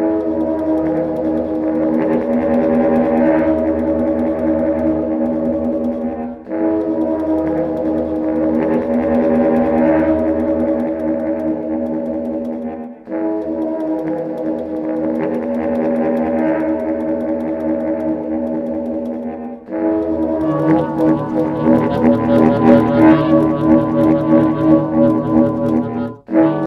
Baritonsaxophone-multitromble-2
Baritonsaxophone, low, tiefer. grow...
07, 12, 2017